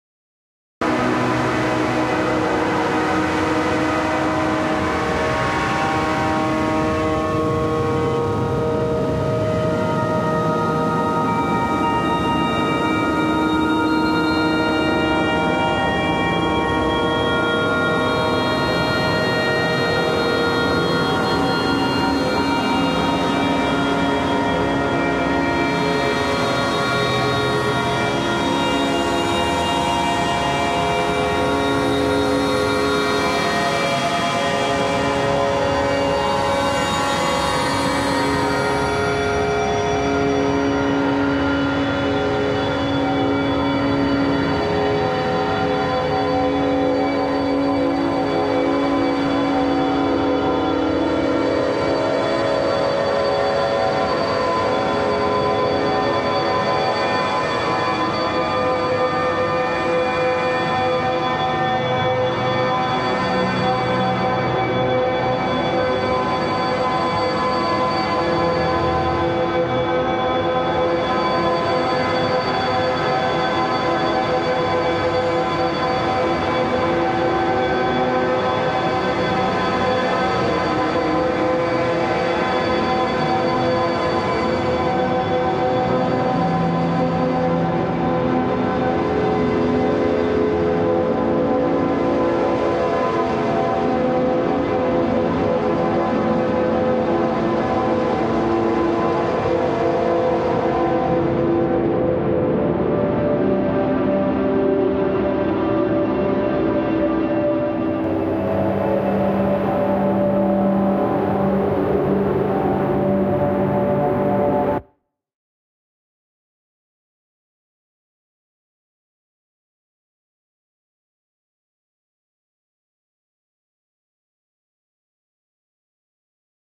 stump pad 2
A very noisy field recording of instruments on a crowded street fed through a granulizer.
sci-fi, atmosphere, horror, breathy, detuned, noise, evil, pad